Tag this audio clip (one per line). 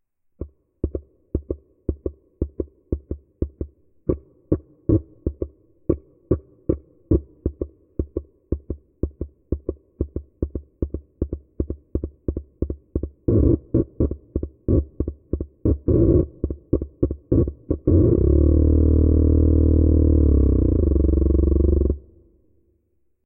cracklebox
slow-speed
speed-transformation
tombola